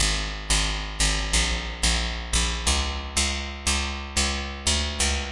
90 Partomik synth 02
standard lofi hiphop synth
free, hiphop, lofi, partomik, synth